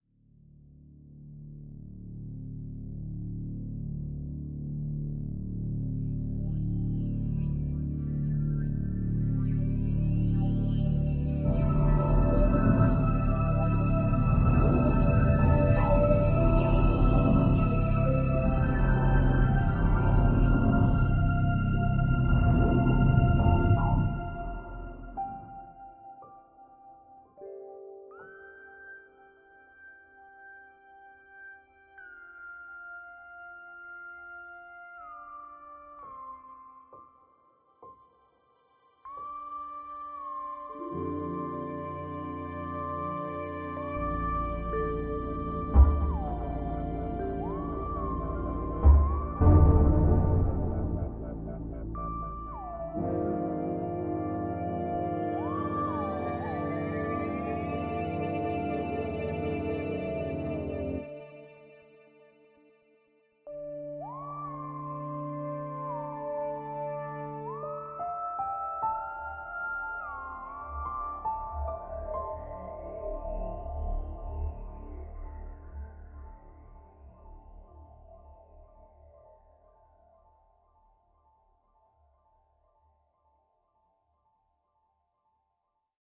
WORRIED WAILfade
Dark, vaguely American Indian sounding introduction, slight feeling of menace, mystery, ends up sounding a bit X-files.
odd, dark, unease, menace